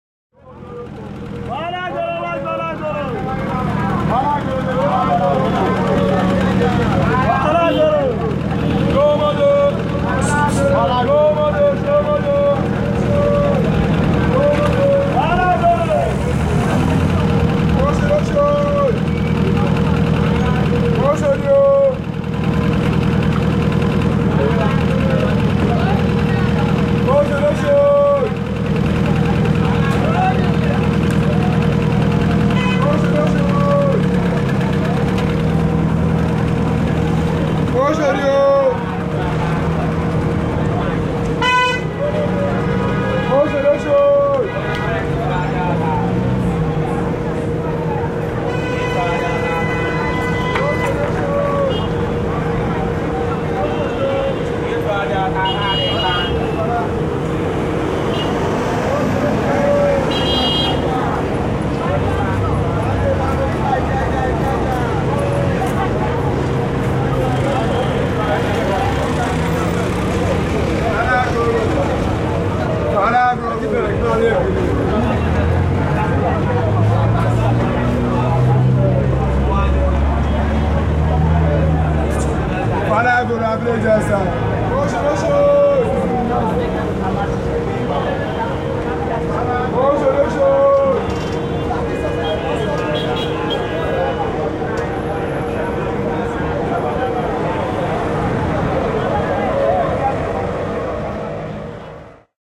Recording Of Sabo Yaba Bus Stop, Lagos-Nigera